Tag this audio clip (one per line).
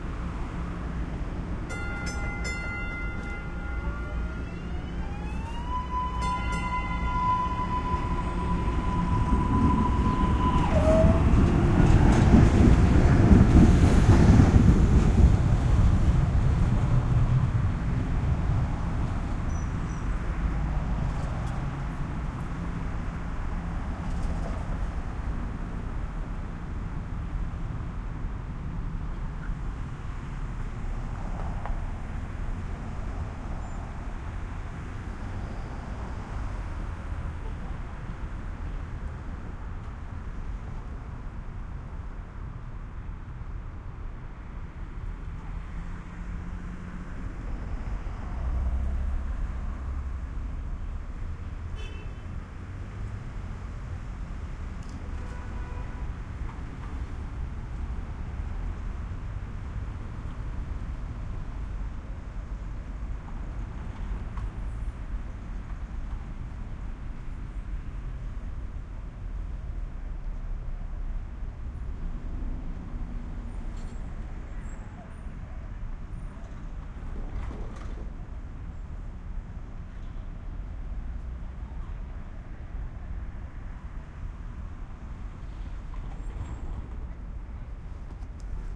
boston
field-recording
mbta
stereo
subway
t
train